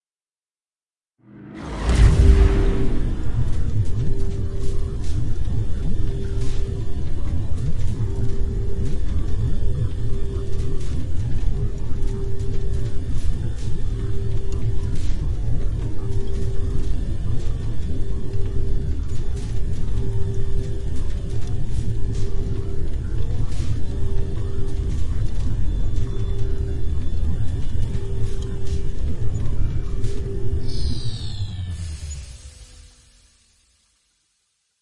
Sound design i made for a video game,layered a bunch of sounds and processed them to make and impactful laser beam sound effect